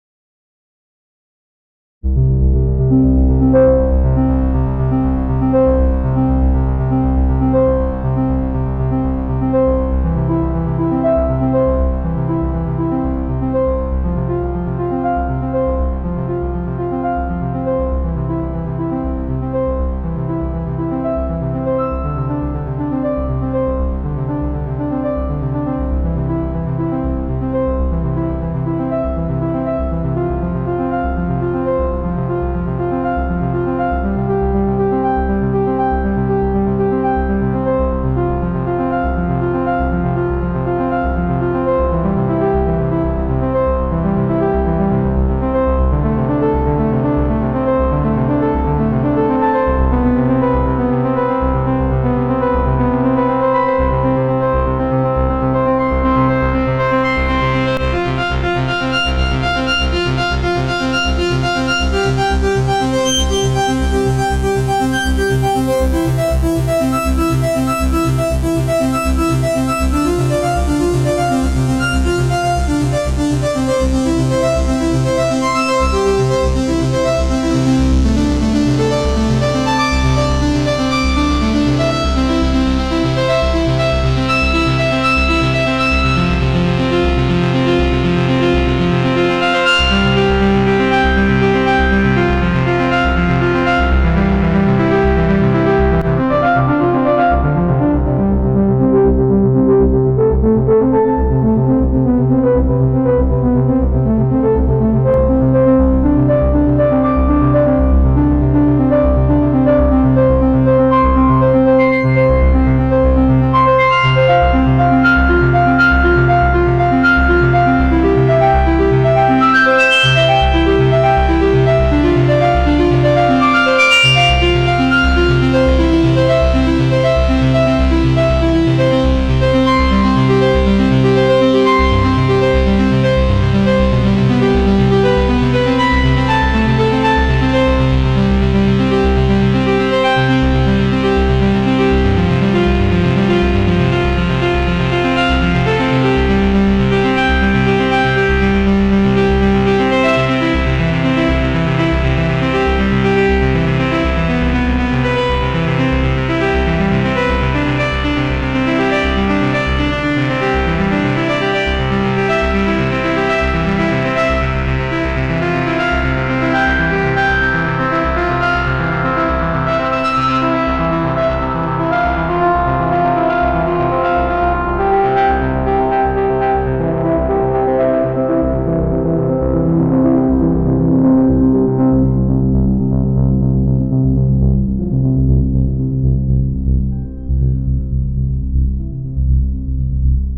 Arpeggio melodies with the Helm OZ Prarie Arp synth. Sequenced in Ardour with automation over several synth parameters.